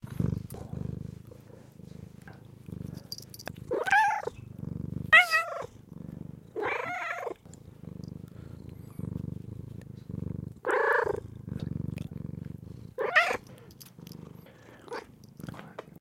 My cat Queen Felicia gives some gentle purring as well as a few happy chirps while sitting on someone's lap.
cat, cat-sounds, chirp, feline, kitty, meowing, purr